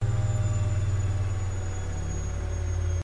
washing machine E (monaural) - Spin 7
high-quality, washing-machine, field-recording